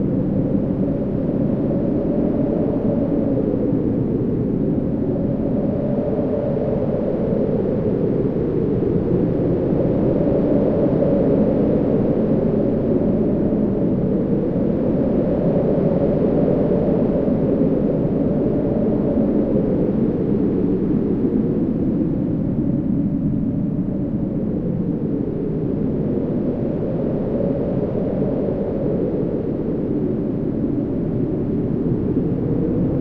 Looping sound effect resembling a strong wind. Created using granular synthesis in Cubase 7.
Since the latest blog post pointed out that many people were looking for wind sound effects I decided to make one.